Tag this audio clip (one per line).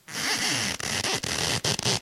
shoe
creepy